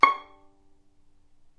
violin pizz vib C#5
violin pizzicato vibrato
pizzicato, vibrato, violin